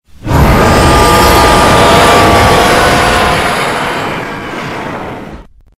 Some kind of Hollow roar
I tried making a Hollow's roar from Bleach. Not quite sure how it turned out.
Bleach, Hollow, Howling, Monster, Roar